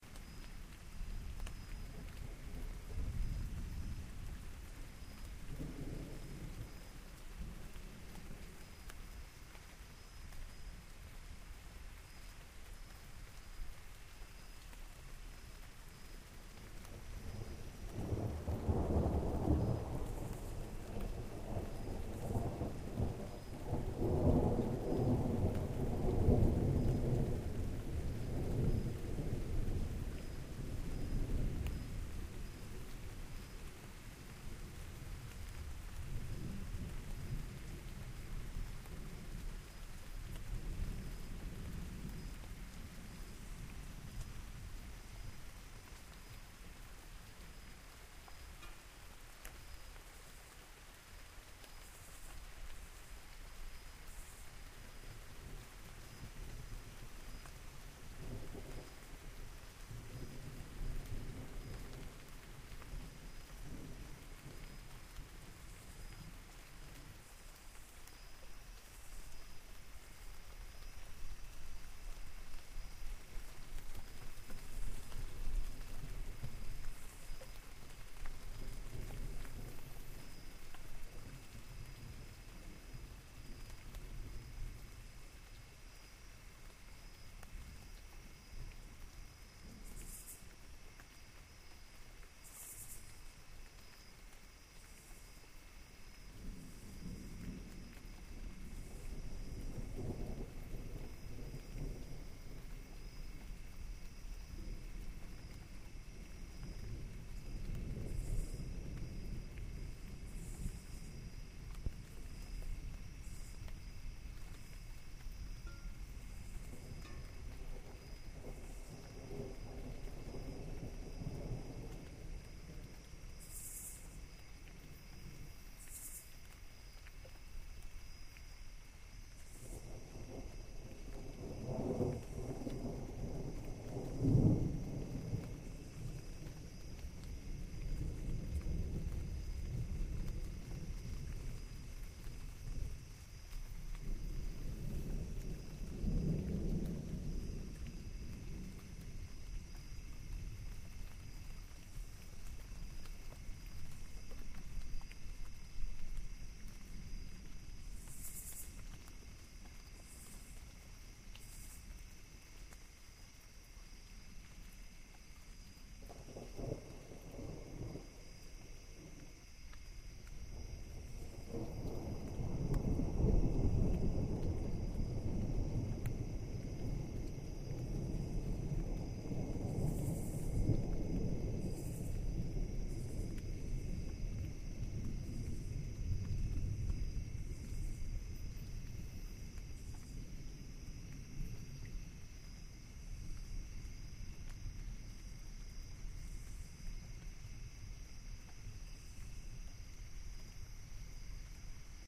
Stereo recording: rain, a bit of thunder, then back to the rain. Recorded outside.